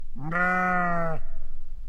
Sheep Mehh 01
A sheep bleats in a field.
Bleat, Ranch, Sheep, Farm